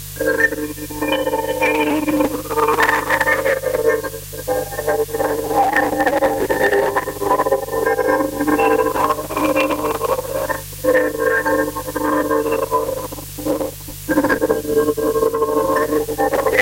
Aesthetic ambient (VHS quality)
cassette, lofi, aesthetic, vhs